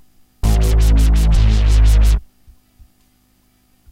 BassSynthLeft 1 in A
Bass Sample Mono (Left of the Stereo), created with Triton LE 2 Step Bass with LFO.
Bass, Dubstep, LFO